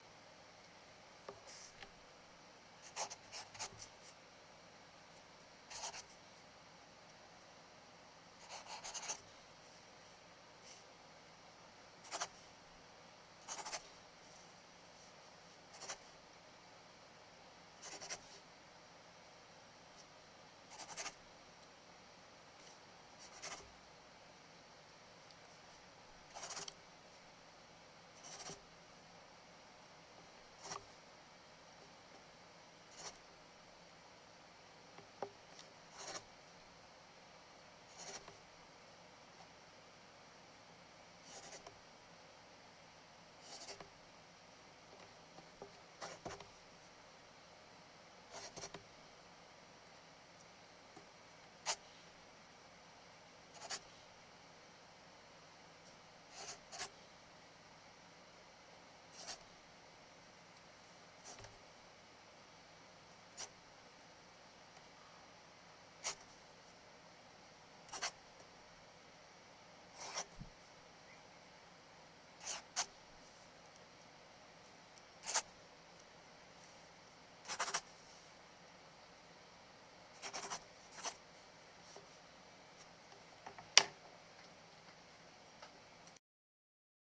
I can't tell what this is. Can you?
A recording of myself writing on a pad of paper.
The sample has had little editing. Bass was reduced to quiet a bassy humming from my room.
I've tried to cover the most obvious and fitting hand motions so this could be synced with actual writing in some fashion.
Personally, I have used this in a 2D game in which all of the user interface looks like writing on paper.
Credit is not necessary.
paper, pencil, unedited, write